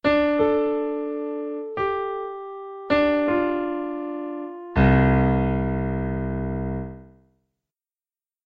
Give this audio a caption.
A simple sad melody for a game over screen.
When our hero dies and the screen fades to black, you know it's over, but that doesn't mean we can't re-start.
death, game-over, games, sad, soundtrack